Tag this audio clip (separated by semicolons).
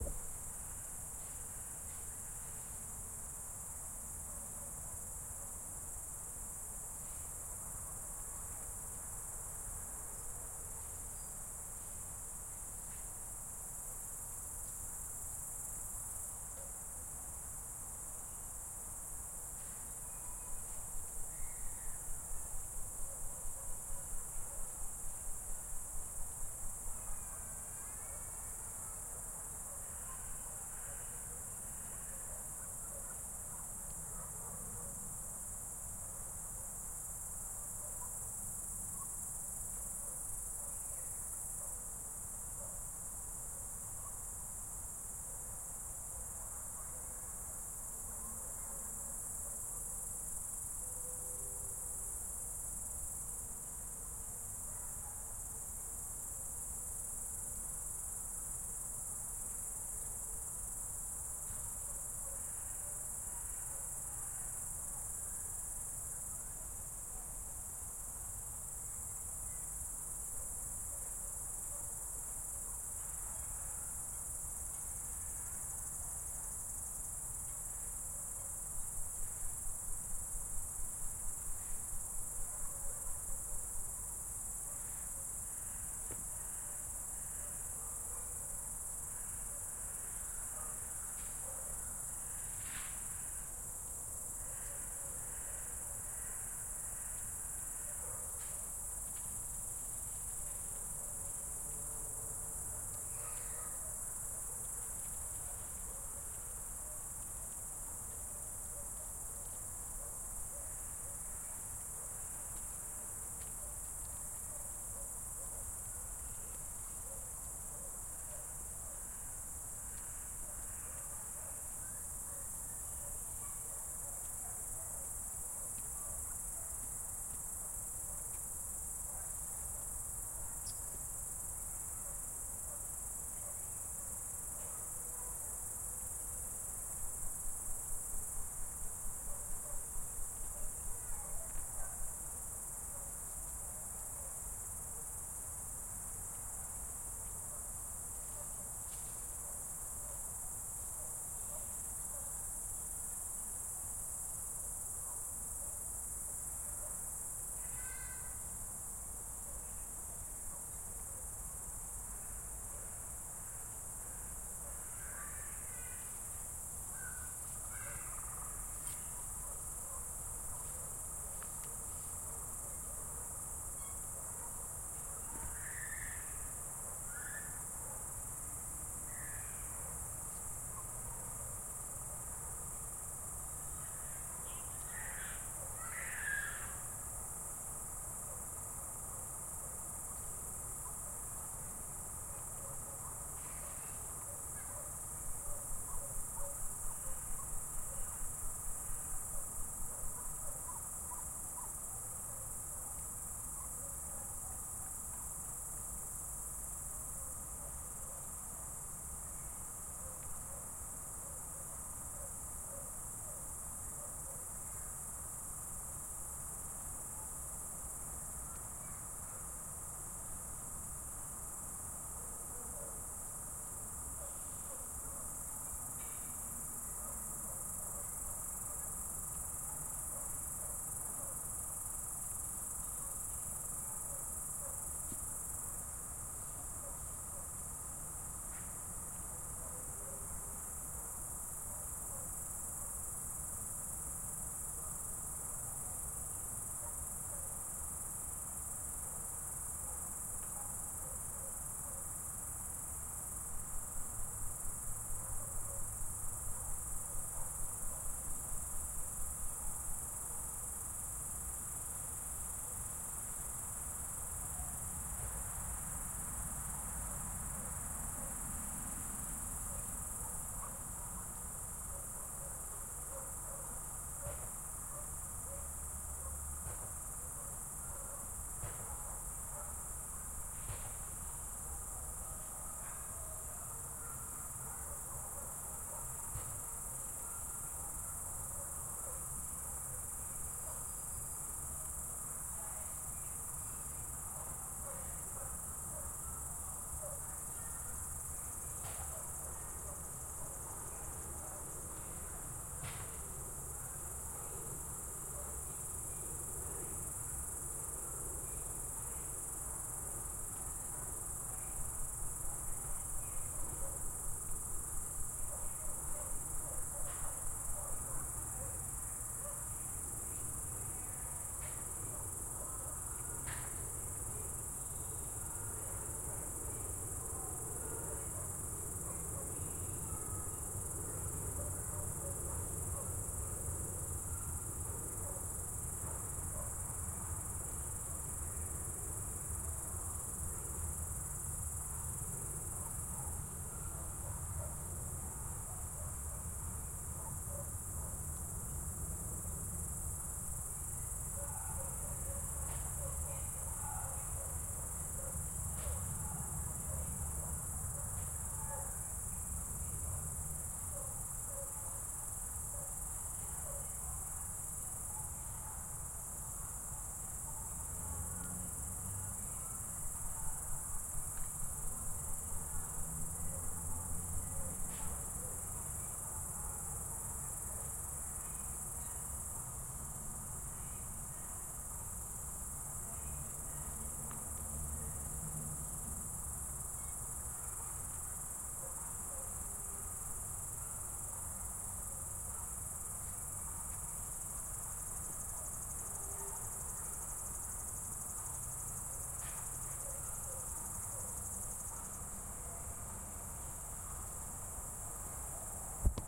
field; recordings; crickets; summer